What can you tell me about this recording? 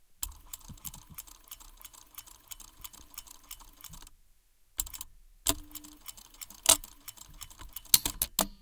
Queneau machine à coudre 45
son de machine à coudre